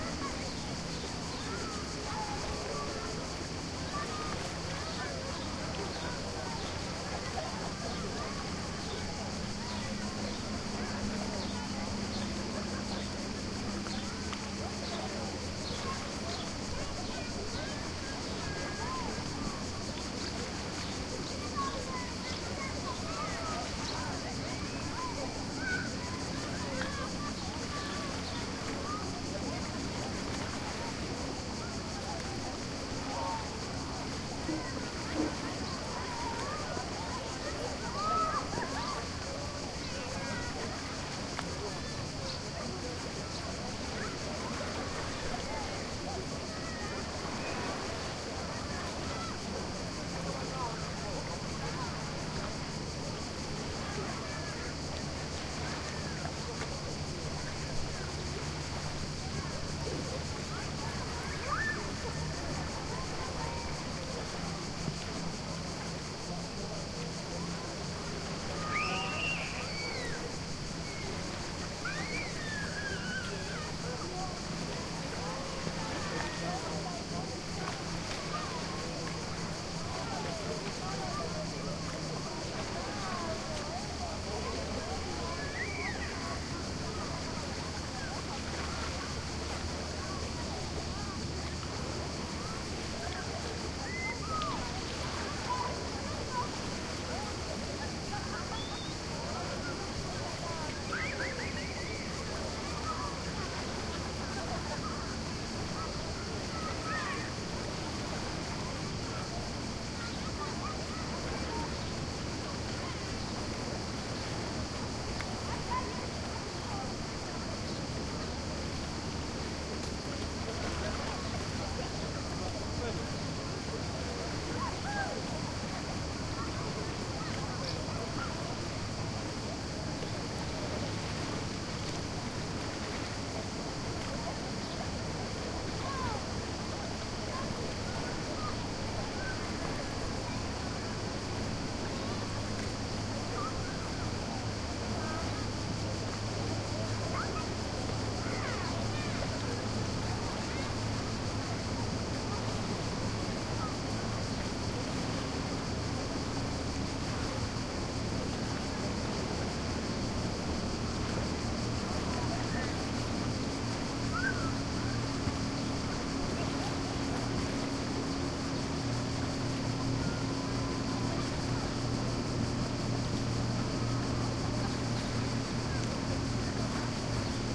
Wide-Angle surround recording of the beach at Brela / Croatia, recorded from a distance of approx. 40m and a height of approx. 25m. It is a warm summer afternoon, the beach is teeming with (mostly very young) bathers, crickets are chirping and swallows are flying in abundance. A nice, peaceful, relaxing beach-atmo.
Recorded with a Zoom H2.
This file contains the front channels, recorded with a mic-dispersion of 90°